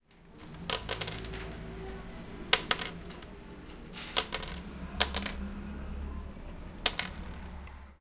mySound-49GR-Flori
Sounds from objects and body sounds recorded at the 49th primary school of Athens. The source of the sounds has to be guessed.
49th-primary-school-of-Athens, drop, Flori, Greece, mySound, pencil, TCR